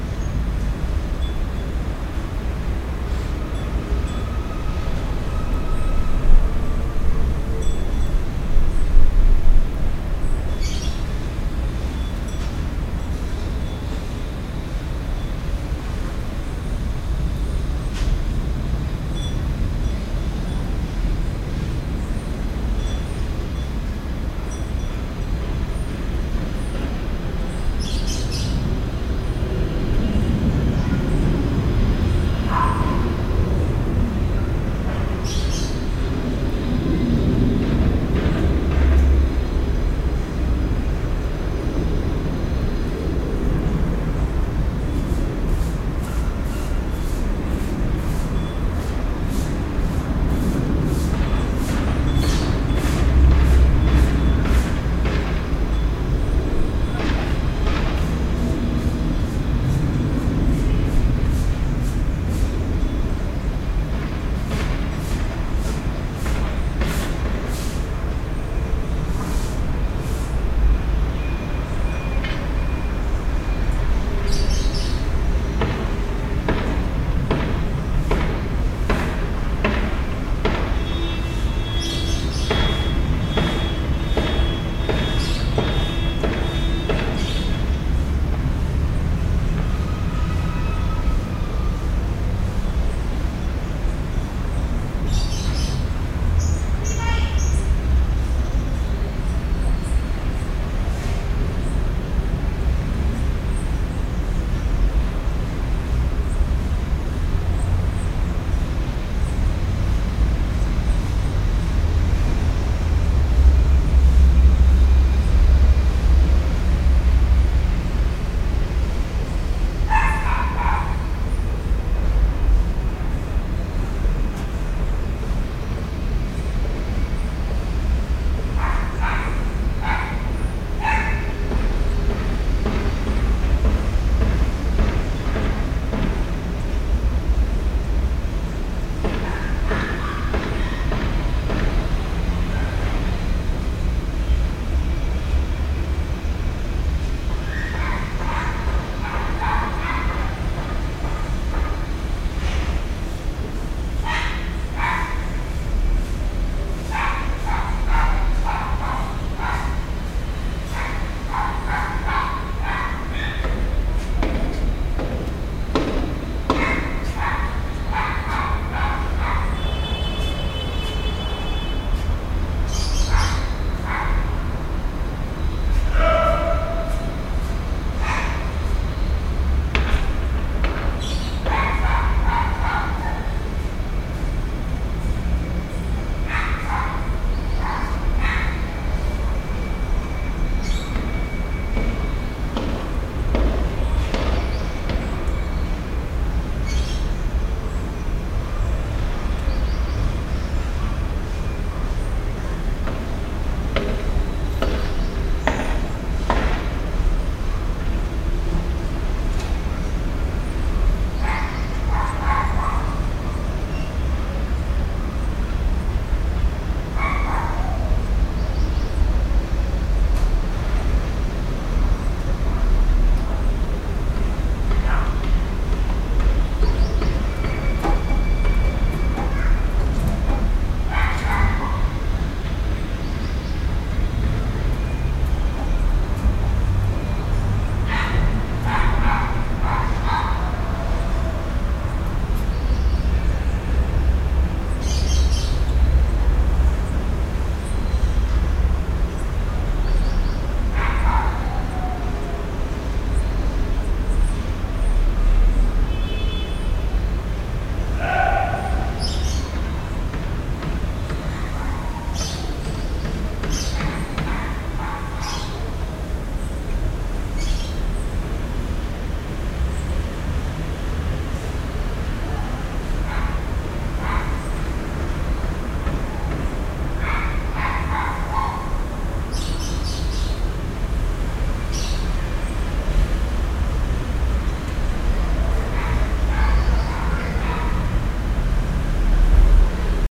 Ambience Residential
Ambiente, Birds, OutDoor, Residential